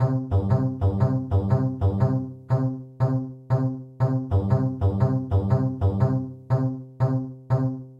A simple sound with a funny sneaky mood
Sneak, sountrack, bass